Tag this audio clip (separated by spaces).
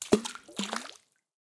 water Panska drop CZ Pansk stone pool Czech